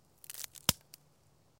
Stick Snap 1
Simple stick snapping
crack, snap, snapping, stick, twig